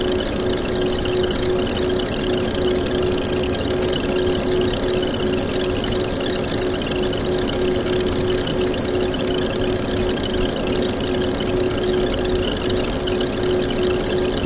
ATM Card Reader
A sound recorded near an ATM's card reader. Possibly it's something related to anti-skimming. Recorded using Zoom H1n.
artificial, atm, automation, electronic, machine, mechanical, robotic, strange